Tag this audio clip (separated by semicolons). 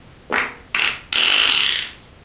aliens; beat; car; computer; explosion; fart; flatulation; flatulence; frog; frogs; gas; laser; nascar; noise; poot; race; ship; snore; space; weird